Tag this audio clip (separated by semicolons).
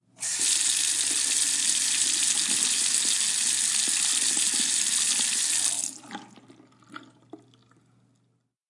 squeaky; sink; water